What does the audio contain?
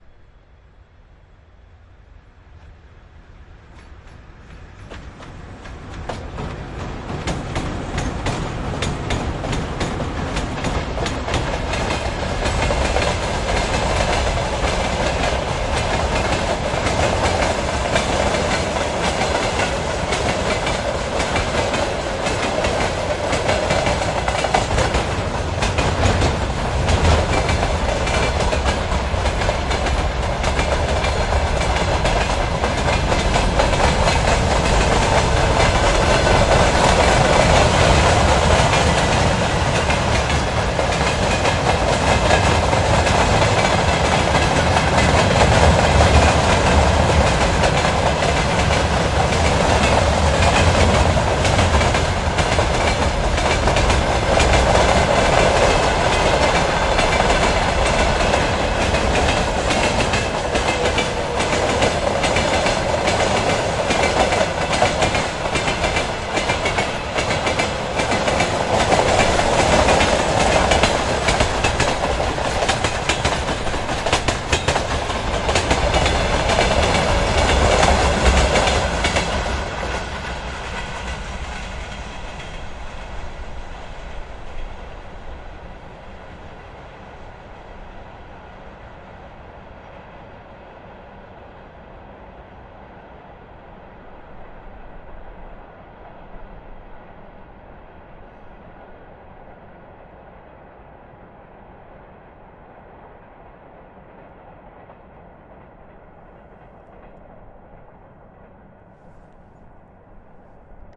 Freight train (VL10) (Zoom H6 recorder)
freight train (Electric locomotive - VL10)
Transport, VL, Trains, Train, Zoom